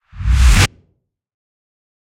woosh fx 3
woosh sfx sound, usefull for video transition. from several sample that i processed in ableton live.
sfx, fx, white, effect, video, transition, woush, woosh, sound, noise, reverse